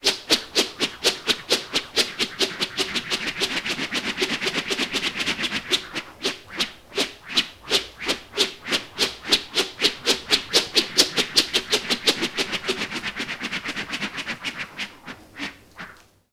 Whoosh Swish Helicopter 01
whoosh, swish